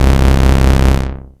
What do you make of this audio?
Ruff Bass
a scary bass sound with a bit of a lfo. sound created on my Roland Juno-106
bass, sifi